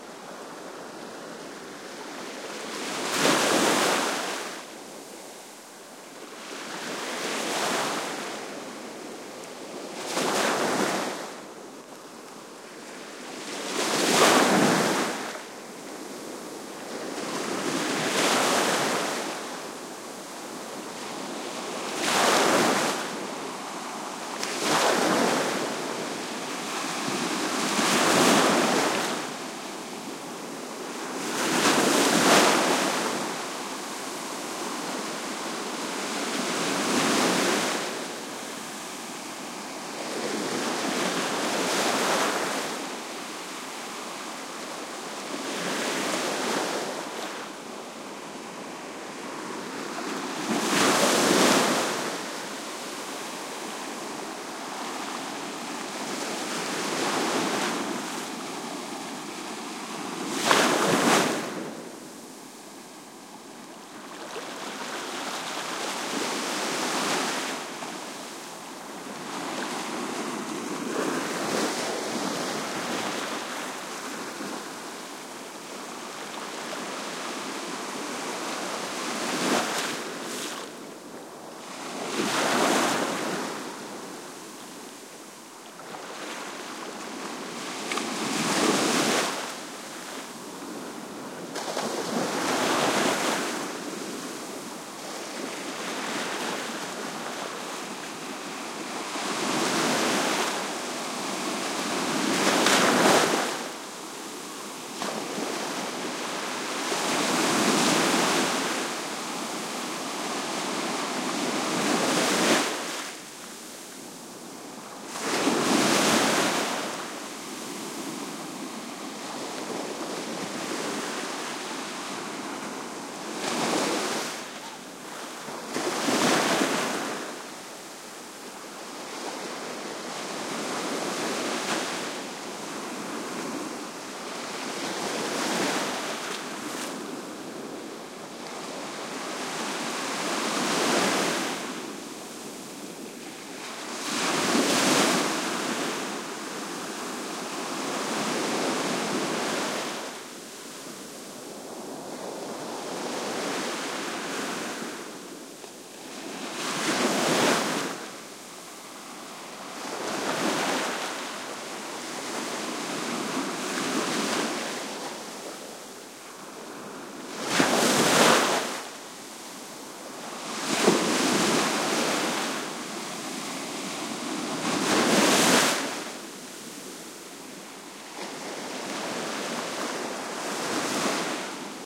Breaking wawes on a sandy shore, filtered below 150 Hz. Shure WL183, Fel preamp, PCM M10 recorder. Recorded on Praia do Barril, Tavira (Portugal)